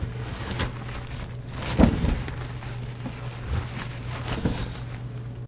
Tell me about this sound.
Bathroom PaperBath
sound of paper